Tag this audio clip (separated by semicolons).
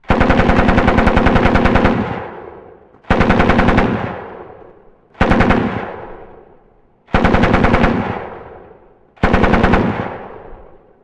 gun; anti-aircraft; m240; weapon; heavy; rumble; bang; machine